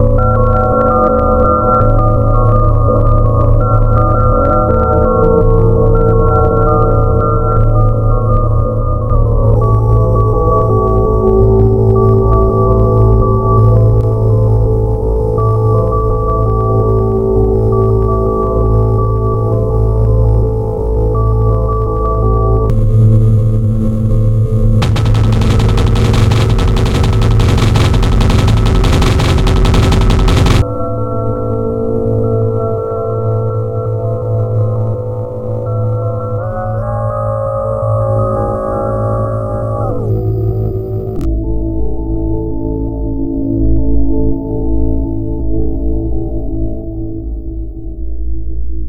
sunvox ambient record